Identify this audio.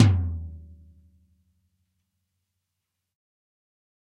Dirty Tony's Tom 14'' 057
This is the Dirty Tony's Tom 14''. He recorded it at Johnny's studio, the only studio with a hole in the wall! It has been recorded with four mics, and this is the mix of all!
14, 14x10, drum, drumset, heavy, metal, pack, punk, raw, real, realistic, tom